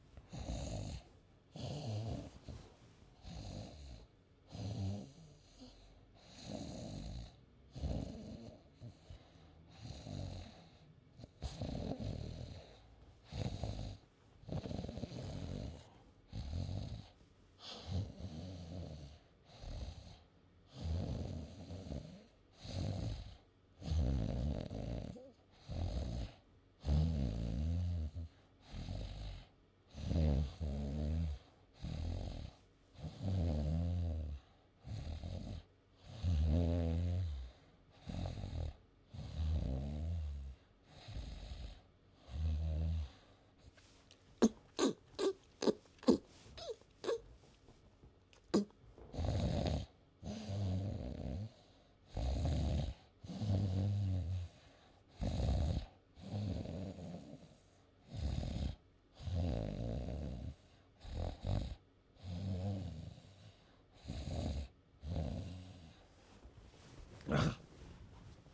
A man snoring